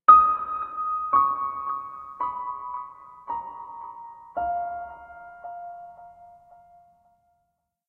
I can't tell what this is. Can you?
Mellow piano phrase, 4 notes descending, part of Piano moods pack.
calm, mellow, mood, phrase, piano, reverb